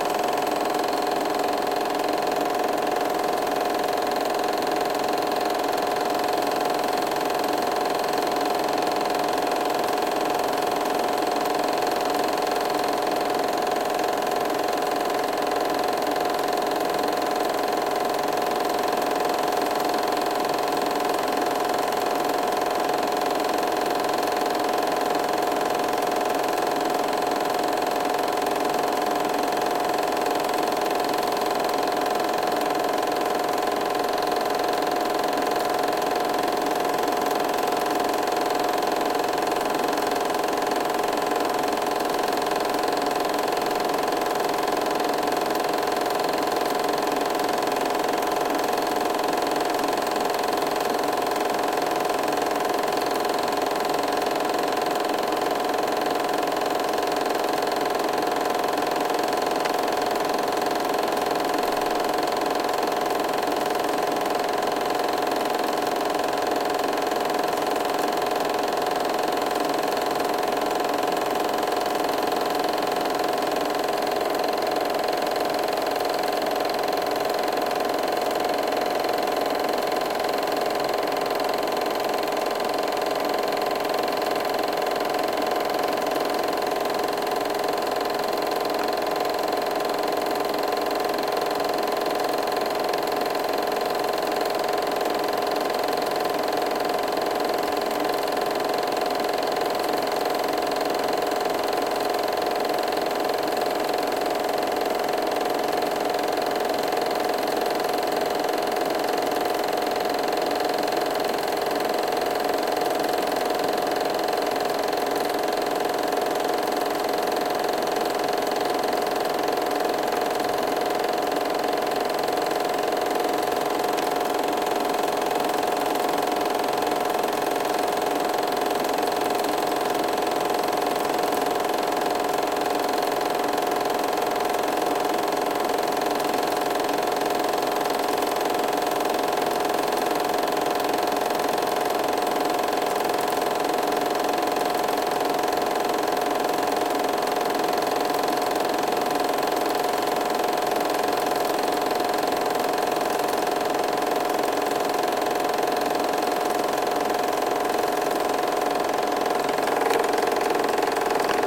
8mm projector sound
The sound of a "super8/single8"-projector working. sometimes you can hear a little extra rattling from the rotating reels. recorded in a studio with a canon EOS600D.
Enjoy the sound, credits are not necessary but always appreciated.